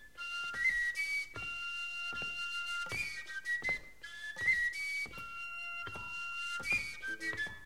willow-flute
Ancient willow flute
ancient, flute, Willow